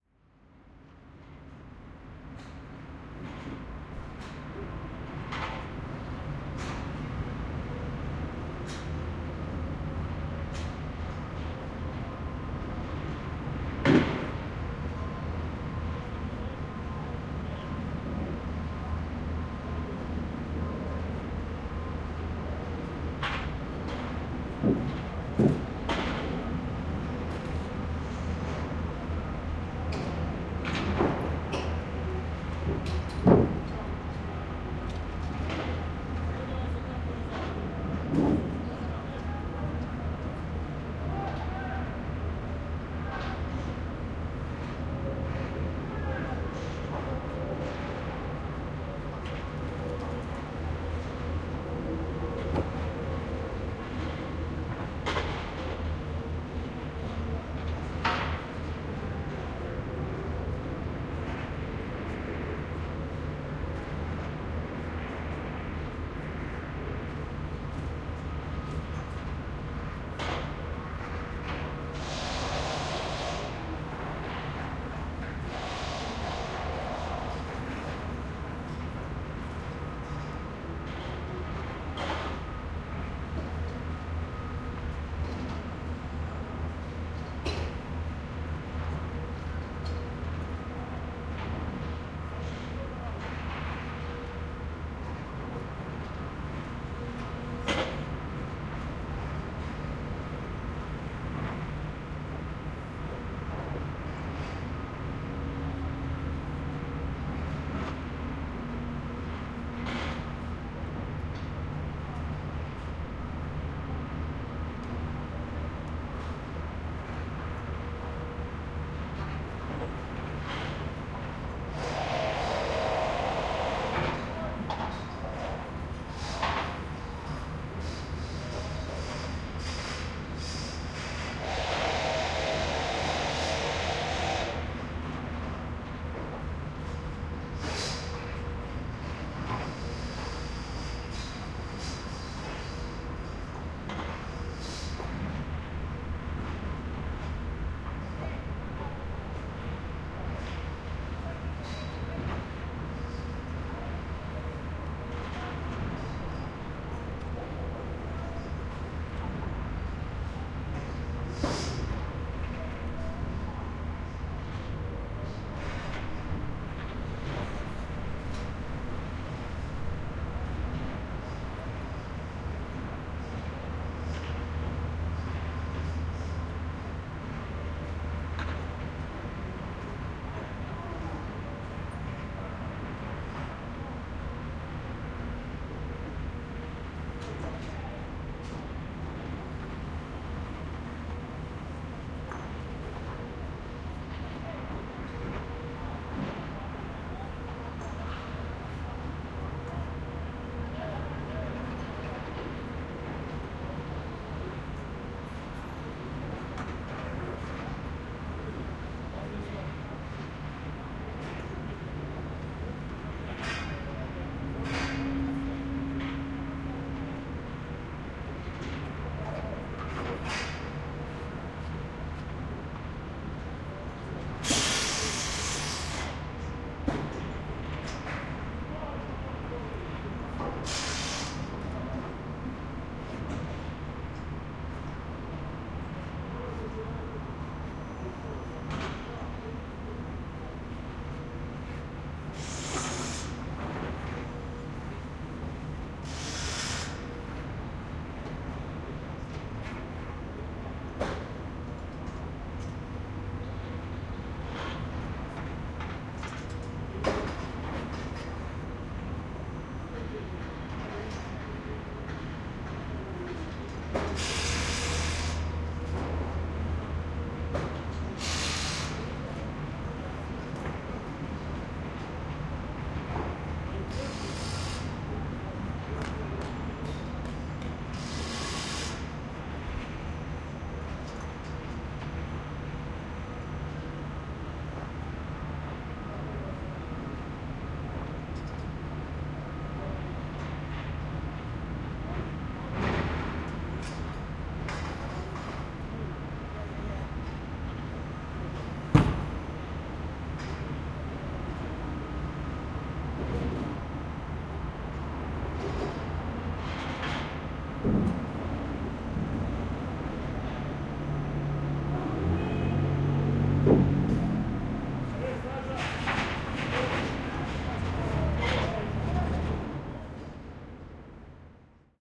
Construction Site sound - Take 2
ambience, ambient, atmo, atmos, atmospheric, background-sound, construction, loud, noise, rumble, site, soundscape, white-noise